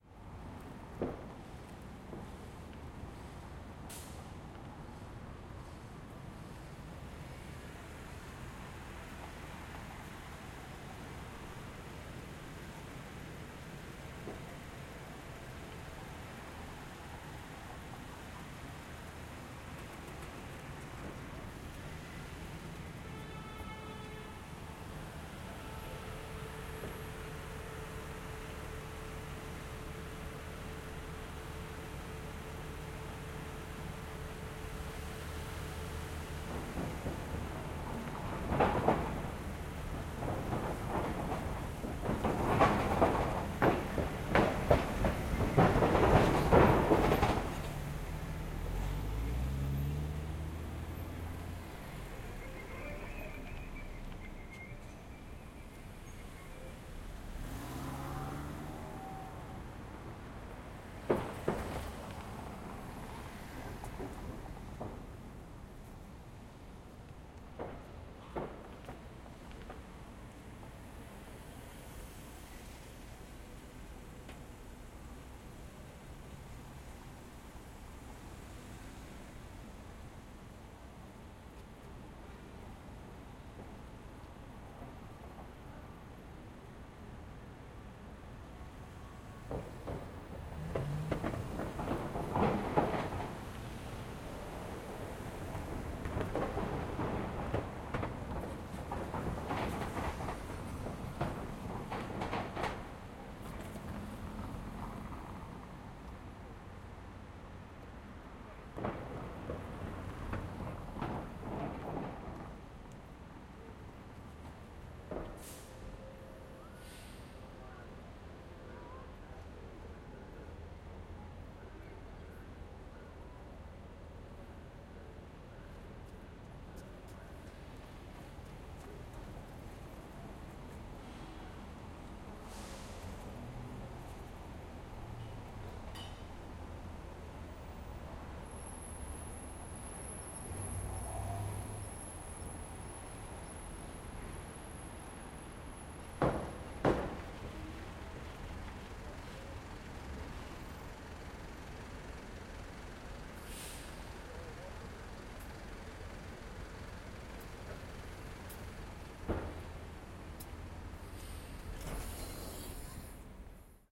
LA Streets 6th and Broadway Morning 5-EDIT
Recorded in Los Angeles, Fall 2019.
Light traffic. Metal plates at intersection. Buses. Street cleaning. Indistinct voices. Honks.